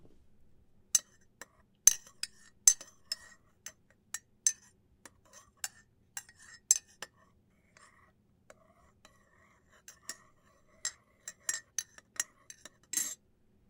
Stirring Tea
Stirring hot tea in mug with spoon.
stir, mug